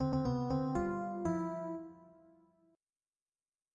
Game Over 2
lose, game, lost, defeaten